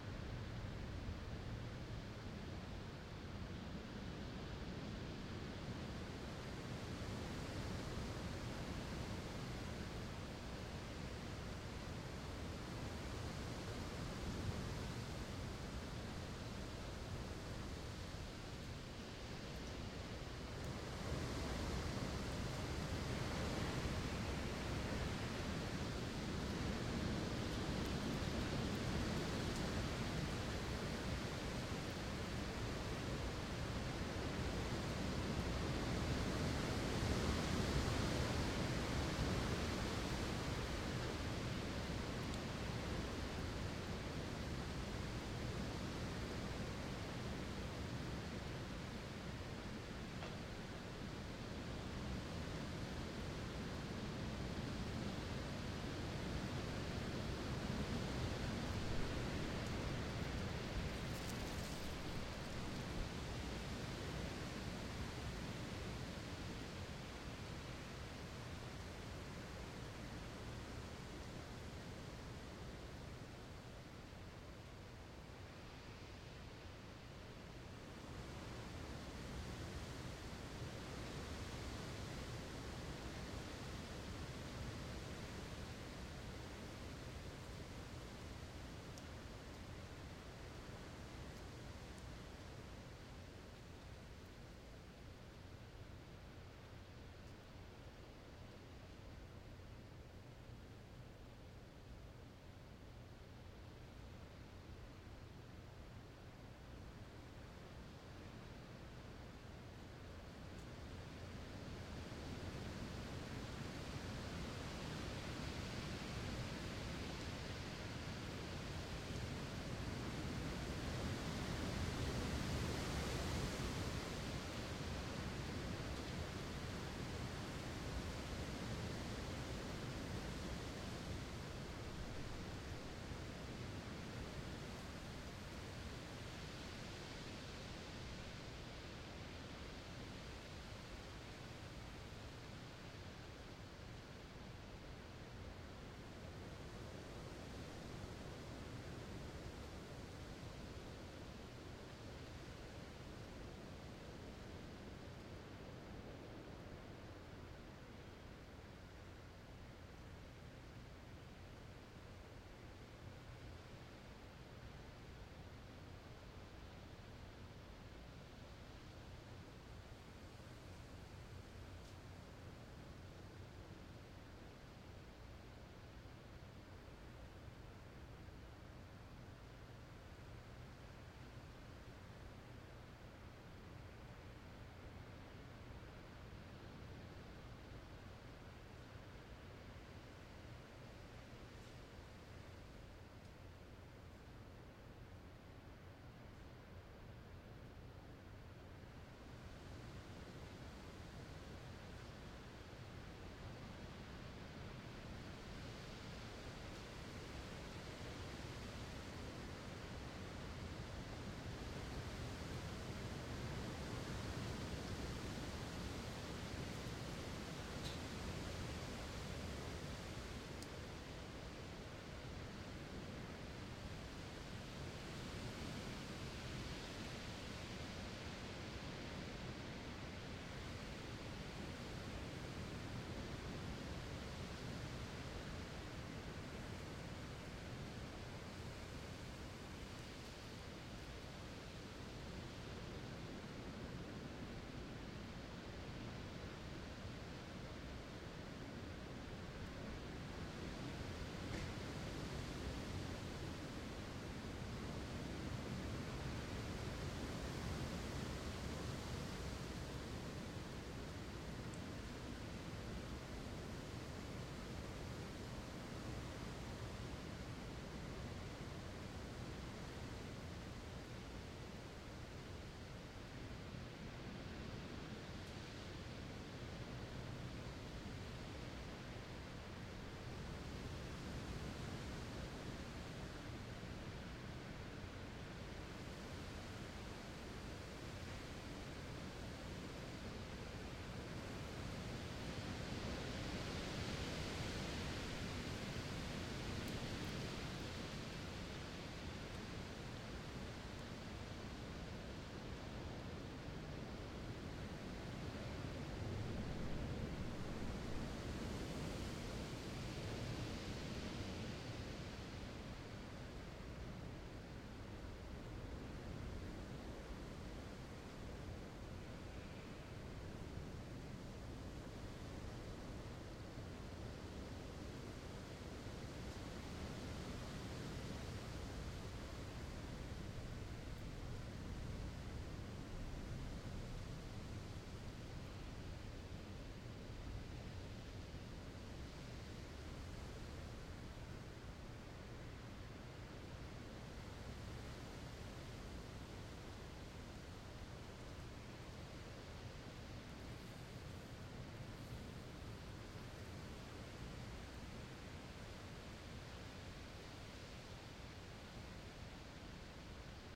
Windy night trees rustling heavy
Very windy night in Los Angeles. Recorded this by opening the window and pointing the mic outside, through the mosquito screen
Rode NTG2 and Zoom H4N
ambience, apartment, city, field-recording, heavy, leaves, los-angeles, night, open-window, rustling, trees, wind